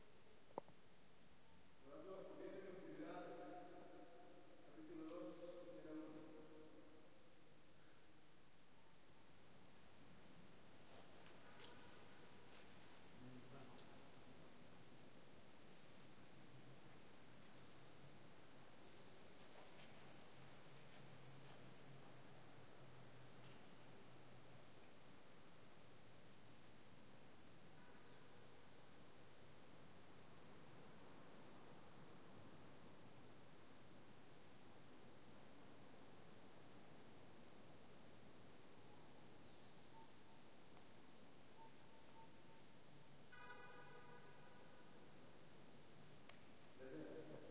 This sound was recorded with my NH4 during the film "La Huesuda" wich is my movie. Was recorded in Ecuador. It´s totally free, and totally safe.